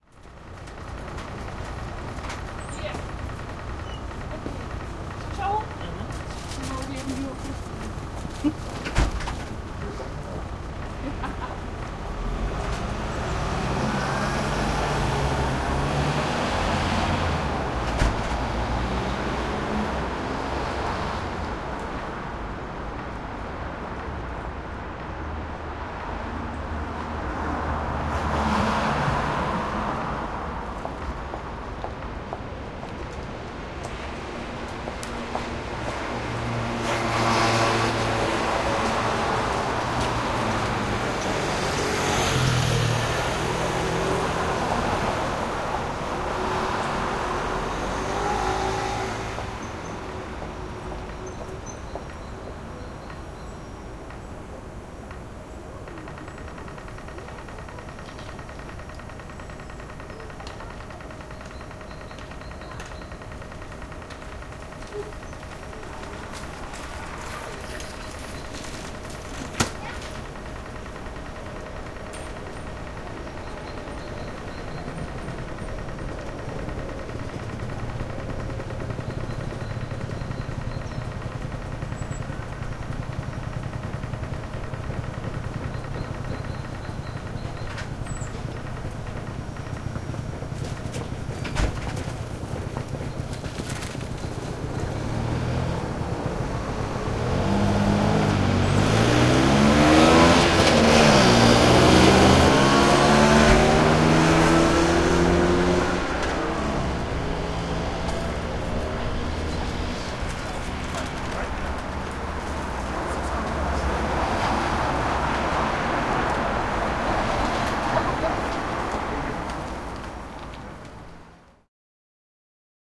crosswalk ambience traffic lights people walking heavy vehicles
Recorded with a Sony PCM-D50 from the inside of a peugot 206 on a dry sunny day.
A little ambience caught at a crosswalk in Amsterdam, bicycles, scooters, buses, trucks and cars passing by and stopping for the traffic lights.